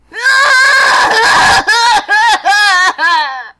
crazy crying
WARNING: LOUD
crying from frustration